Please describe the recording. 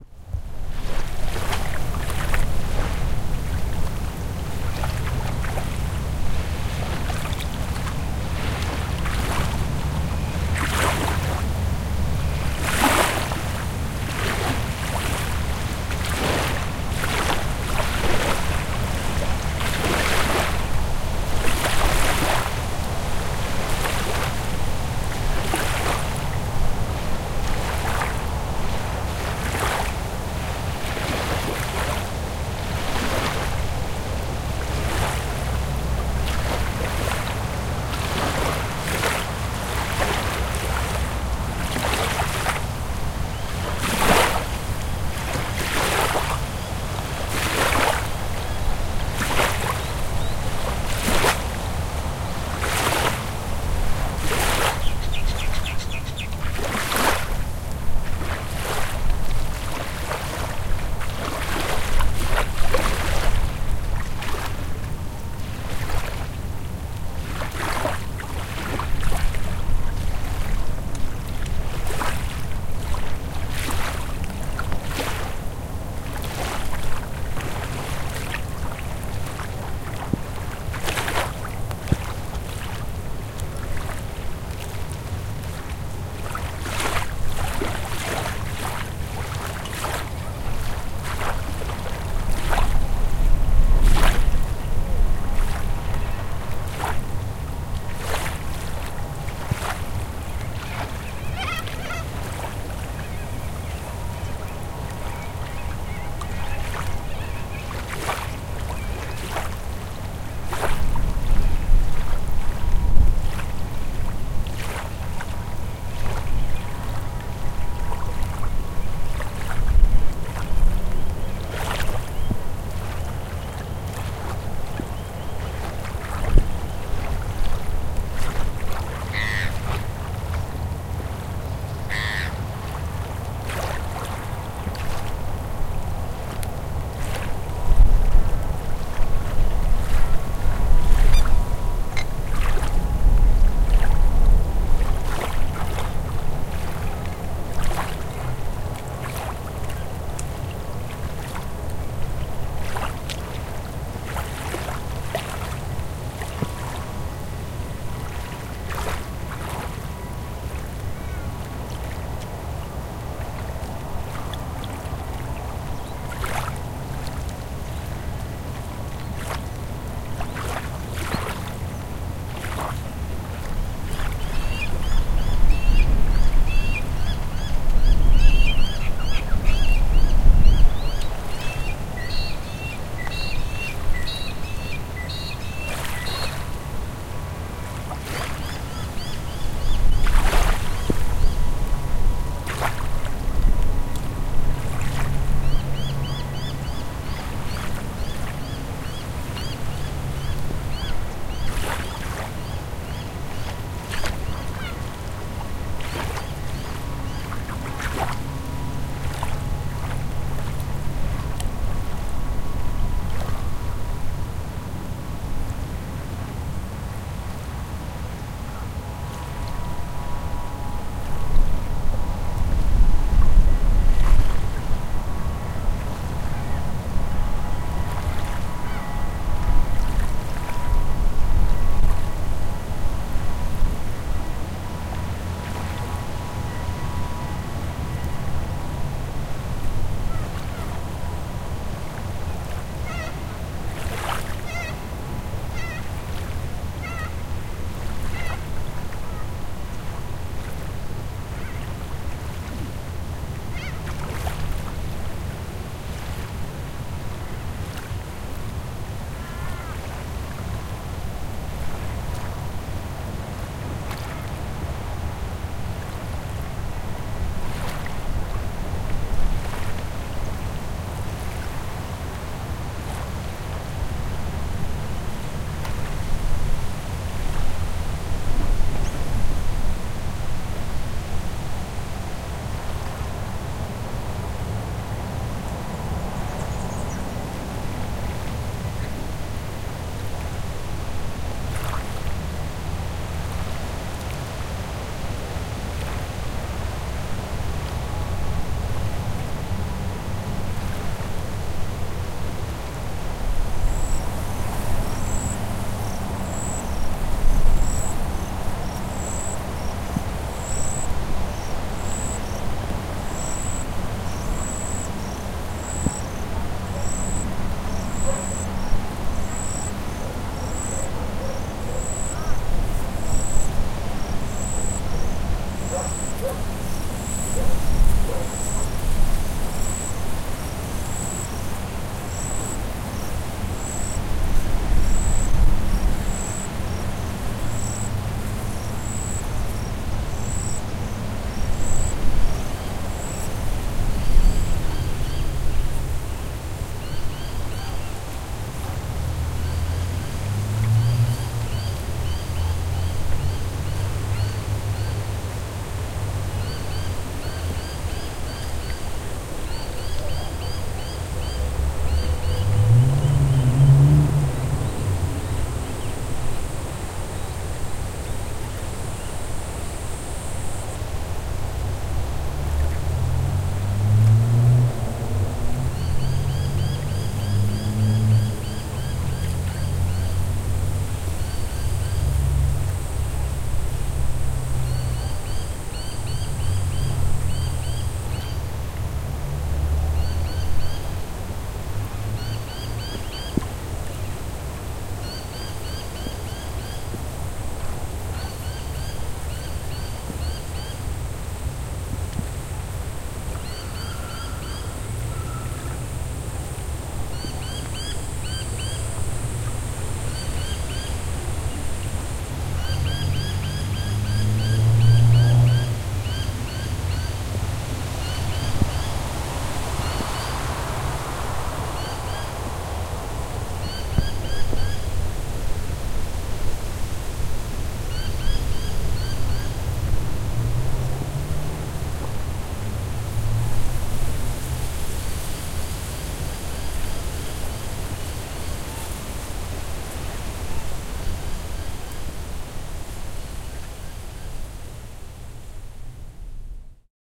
Sounds of Lakeside including water washing, wind, power boat, distant traffic, birds etc. Recorded at Angle Park - Chipping Norton Lake, Sydney Australia. Record Date: 06-FEBRUARY-2010